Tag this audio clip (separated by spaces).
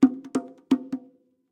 bongo drum